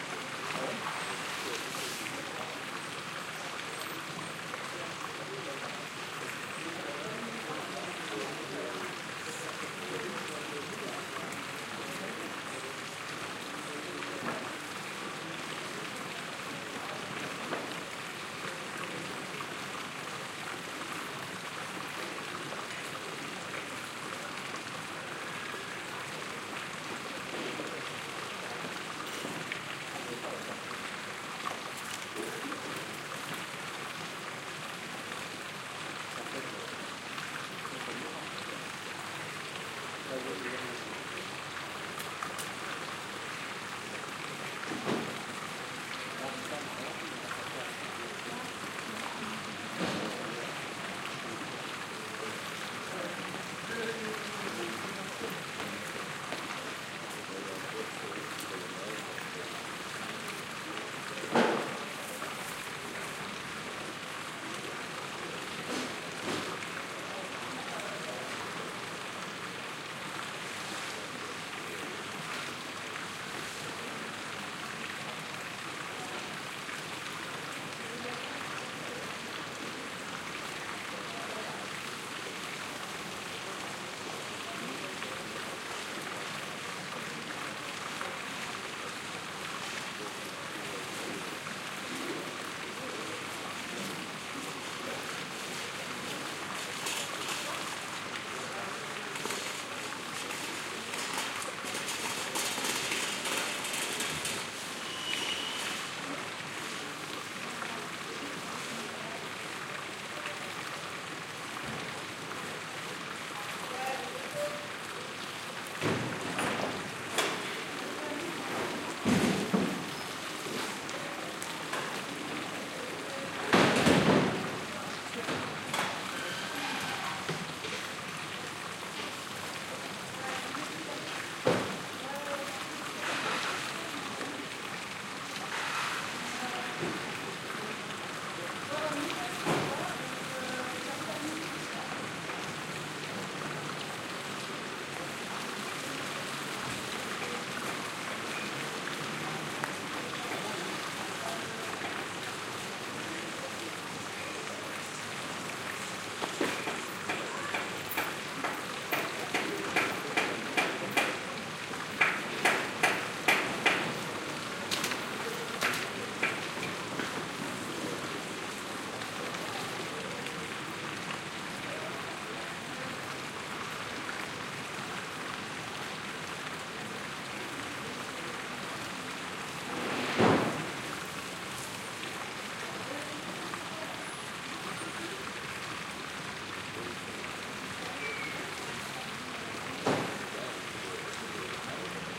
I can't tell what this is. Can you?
Early morning and another sunny day on the Place des Tanneurs in Aix-en-Provence. Shop keepers open their store, restaurants take out the tables and parasols. All the while the water in the fountain quietly keeps running. Nagra ARES-PII+ recorder with the Nagra NP-MICES XY stereo mic.